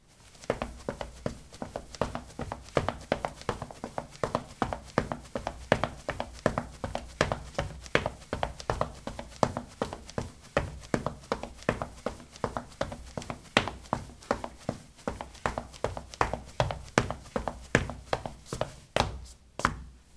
ceramic 10c startassneakers run
Running on ceramic tiles: low sneakers. Recorded with a ZOOM H2 in a bathroom of a house, normalized with Audacity.